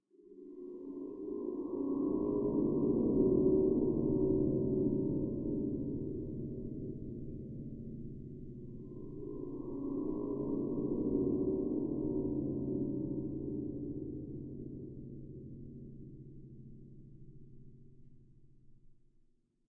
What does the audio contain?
Deep large, smooth and complex one shot movement in an electroacoustic style. Made of edited home recording.